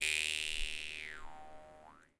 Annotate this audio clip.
jewharp recorded using MC-907 microphone
oneshot, jewharp